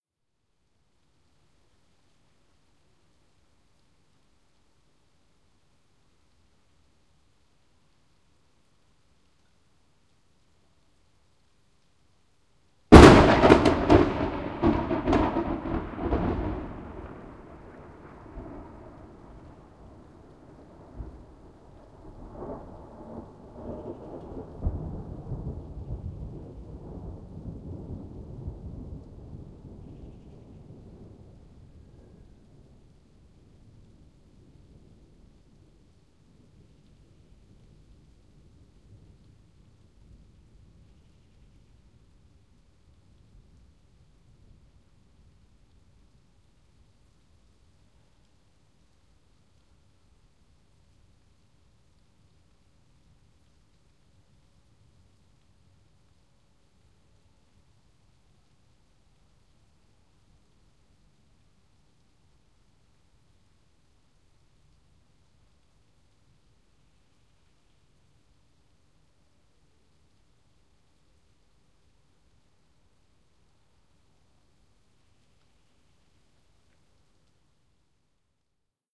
Loud thunderclap (warning : wide dynamic range audio file).
I made this recording from my balcony, in a suburb of Paris (France), during a rainy evening.
One can hear shower rain, and a very loud thunder-strike at 0’12’’.
During the echo time, some magpies are screaming and flying around, probably afraid by the impressive noise and lightning.
Please note that the dynamic range of this file is quite wide, so you’ll probably have to
compress the audio if you’d like to use it in your project.
Recorded in June 2022 with an Olympus LS-100 (internal microphones).
Fade in/out applied in Audacity.